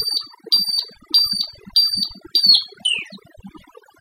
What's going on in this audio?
A few beeps then a phasing out beep. A wonderful sound emitted by a freezer as a temperature warning.
beep, warning, high-pitched, machine